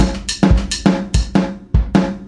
Beat26 (105bpm)
A drum loop from the BEATAholica pack for your playing and sampling pleasures !
105 bpm, made with Ableton.